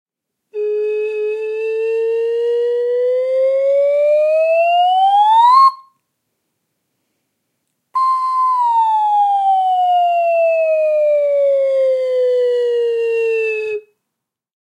Sound of slide whistle (up and down). Sound recorded with a ZOOM H4N Pro.
Son d’une flûte à coulisse (montée et descente). Son enregistré avec un ZOOM H4N Pro.